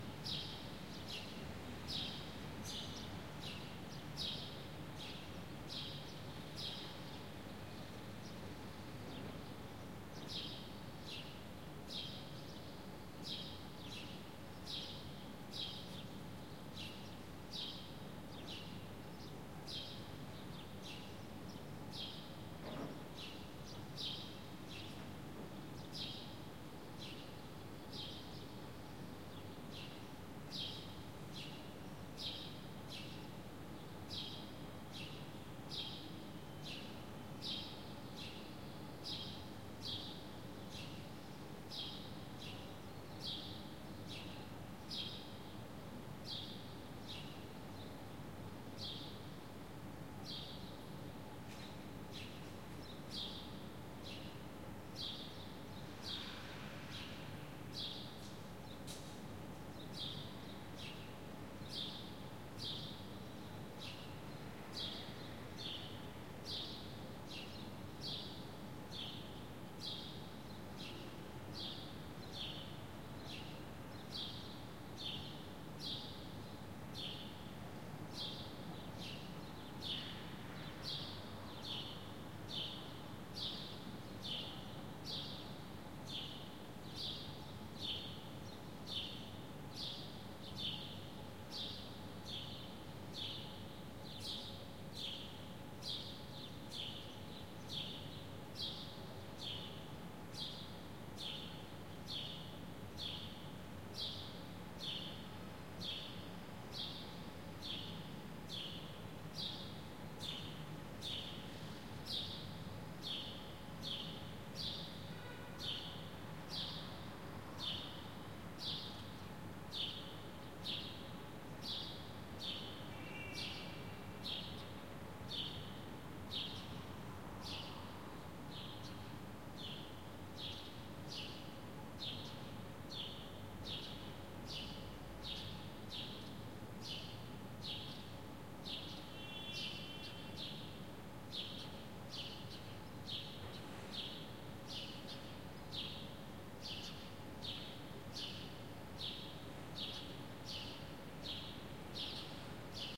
Spring Morning Beirut Birds city ambiance 01
Beautiful Spring Morning birds singing in the city
ambiance, ambience, Balcony, beautiful, Beirut, Birds, City, Day, Lebanon, morning, neighberhood, Park, Spring, summer, Urban